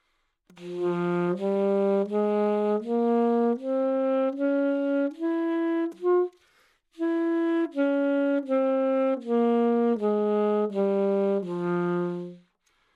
Sax Alto - F minor
Part of the Good-sounds dataset of monophonic instrumental sounds.
instrument::sax_alto
note::F
good-sounds-id::6817
mode::natural minor